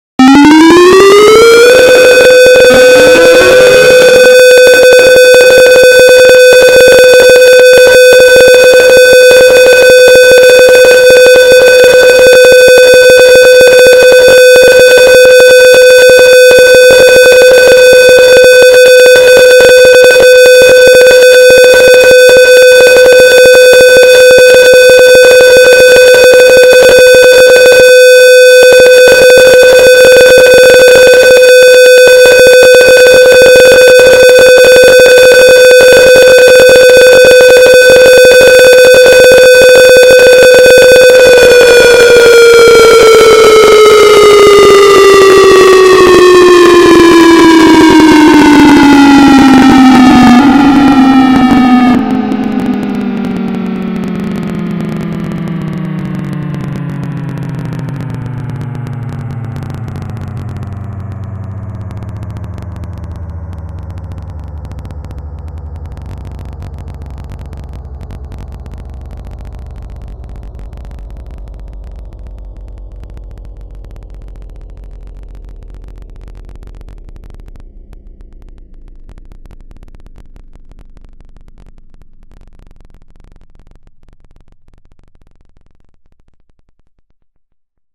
Air Raid Siren 2
Air-Raid, beep, bleep, Effects, pain, Siren